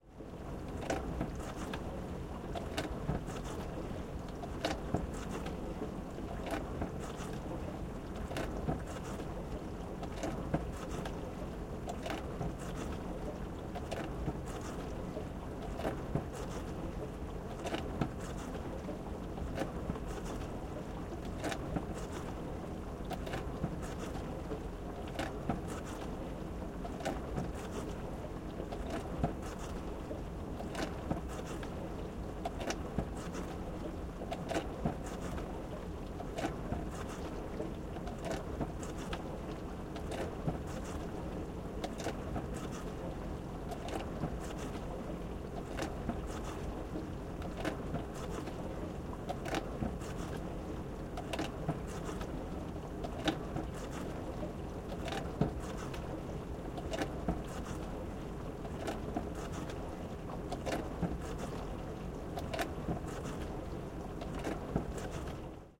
The sound my dishwasher made yesterday morning.
Tascam DR-22WL, Sony ECM-DS70P mic.
appliance, dish, dishes, dishwasher, household, kitchen, machine, splash, washing, water